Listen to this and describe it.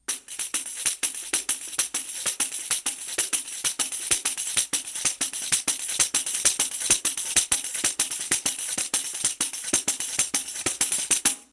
Playing a samba rhythm on different brazilian hand drums, so-called “pandeiros”, in my living room. Marantz PMD 571, Vivanco EM35.